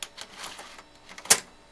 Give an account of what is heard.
inserting floppy disc
inserting a Floppy into the Floppydisc drive.
disc, drive, floppy, floppydisc, floppydrive, inserting